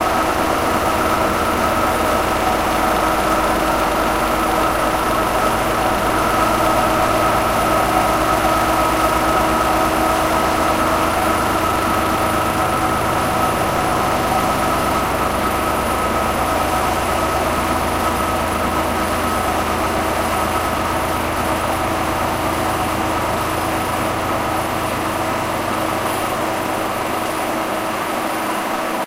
The work of the milling machine.